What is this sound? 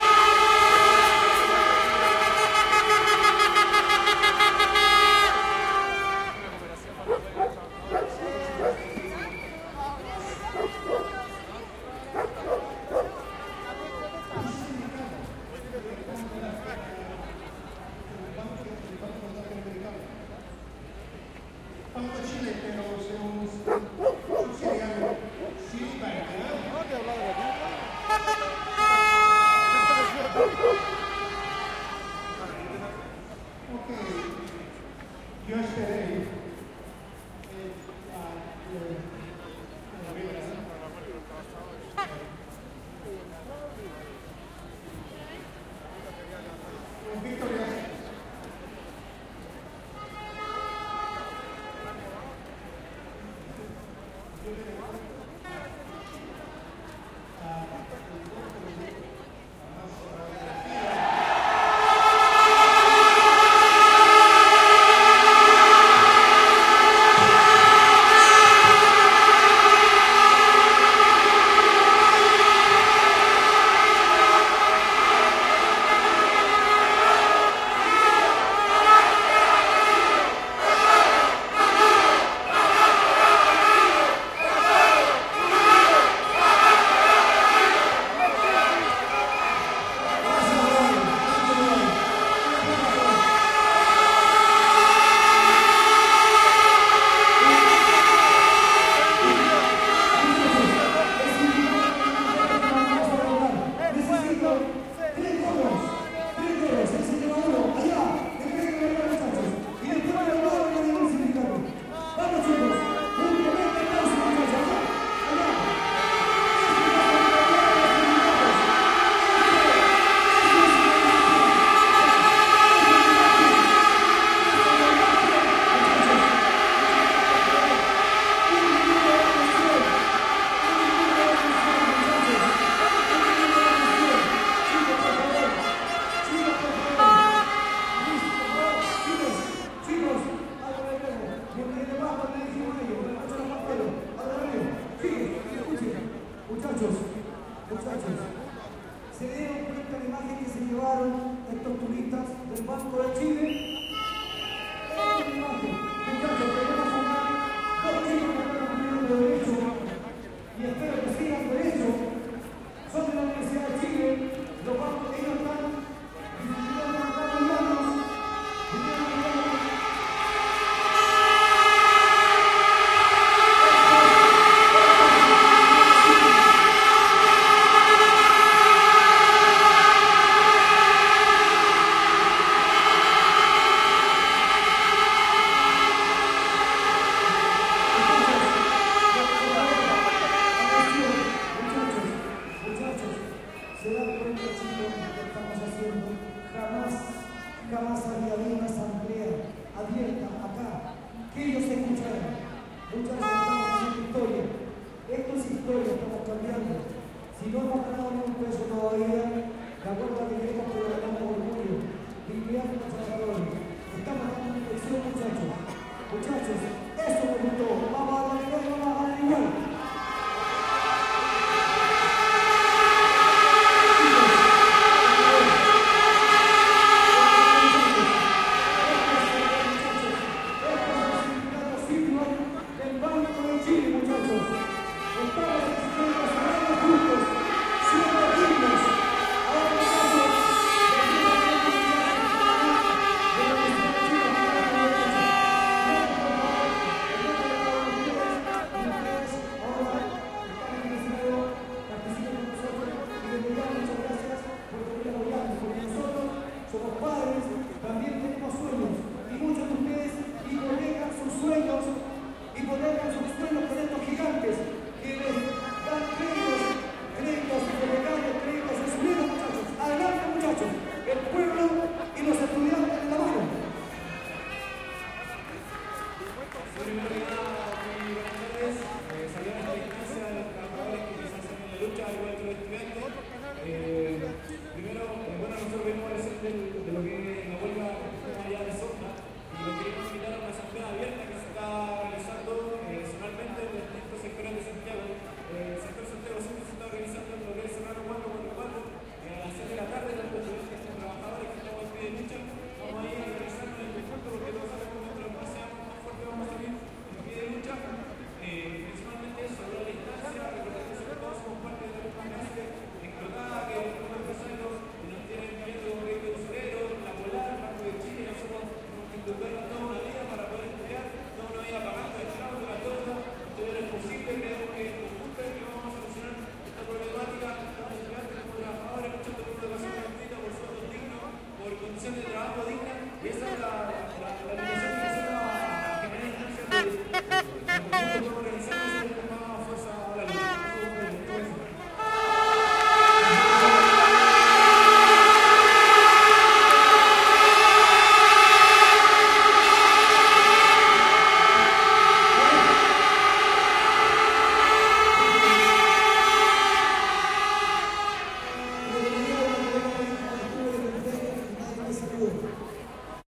huelga banco de chile 02 - habla estudiante de la chile
Habla estudiante de la universidad de chile